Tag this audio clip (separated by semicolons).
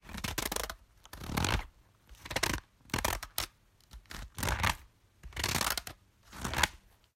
Spiral; Notebook; SFX